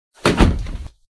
Falling Thud
Made by smashing a pillow into a wall.